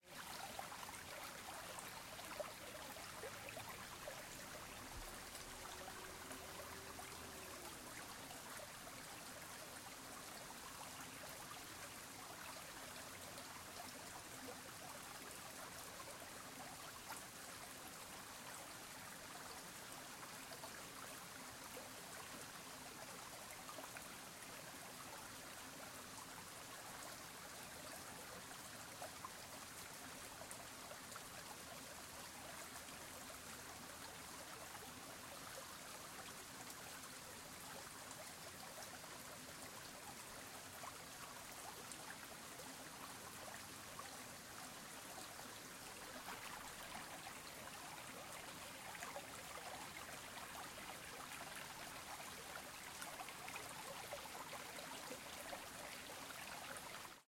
Mountain River 5
various spots on small river
field, field-recording, river, Small, water